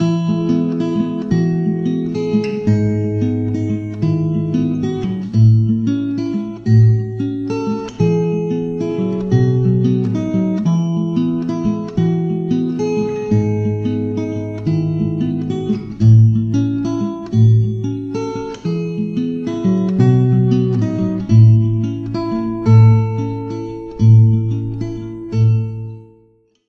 Mamnun 2 (90 bpm)
It's the version 2 of the "Mamnun" loop uploaded few days ago.
Someone asked me rhythmic consistency... now it's the case on 90 bpm
I created this loop with my guitar, 1 track with Reverb effect in Audacity
Take Care,